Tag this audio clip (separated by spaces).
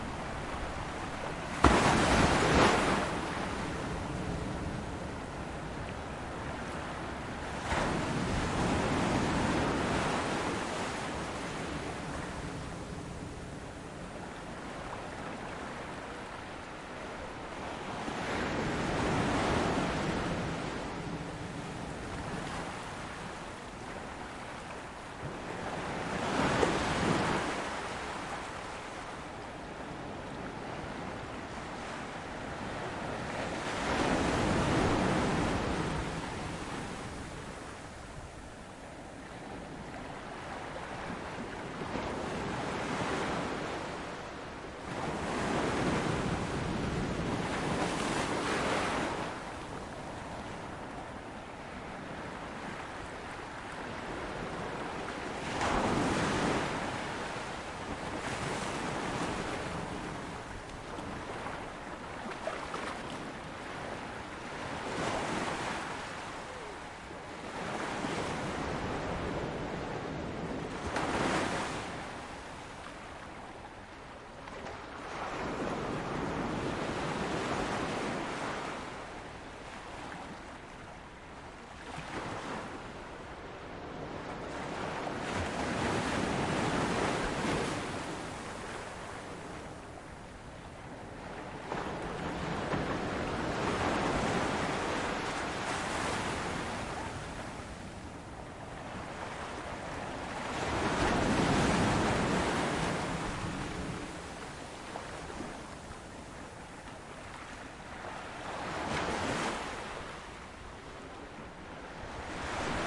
beach zoom waves ambience field-recording portugal